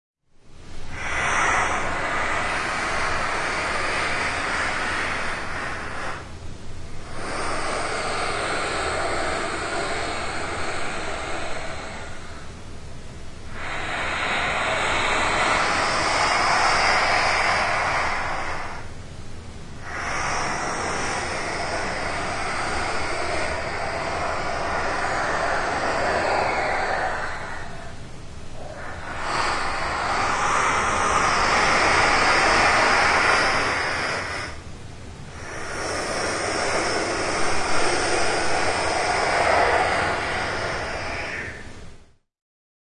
Long Fuzz A
Multiple noisy inhaling/exhaling breaths. Very full sound.
noisy, exhale, inhale